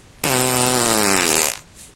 fart poot gas flatulence
gas, fart, poot, flatulence